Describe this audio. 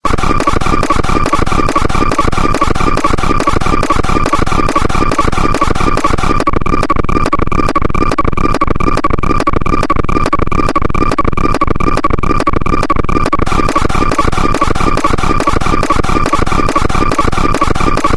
005 - ALIEN MACHINE III
A mix of loops, forming a machine pattern look liking an alien or a futurist vintage machine, like a submarine, the engine sector of a spaceship, a laboratory or a sci-fi generic sound.
Made in a samsung cell phone (S3 mini), using looper app, my voice and body noises.
drone, science, abstract, travel, submarine, weird, sound, loop, space, future, alien, looper, lo-fi, effect, electronic, strange, spaceship, machine, time, horror, vintage, station, scientist, futuristic, sci-fi, engine, sfx